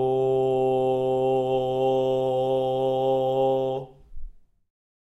This sound was recorded in my bedroom. It was recorded on May 19th between 12:00 and 13:00 with a Zoom H2 recorder. The sound consists in a voiced C note .